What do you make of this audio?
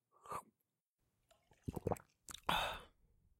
drinking water and swallowing a pill